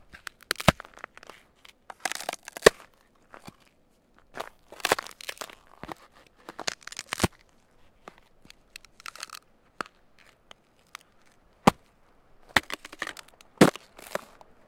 amy breaking ice
My girlfriend stepping on, and breaking some ice in a parking lot.